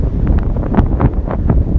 wind windy storm
wind, storm, windy